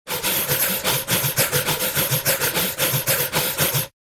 Accum Shift #1
Multiple breath sounds run through a granular-like auto pan
breath, pan, rhythmic, stutter